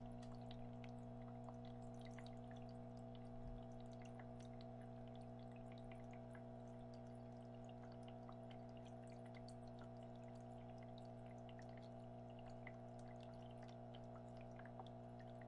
electric water ornament
the relaxing subtle sounds of water trickling in a small ornamental display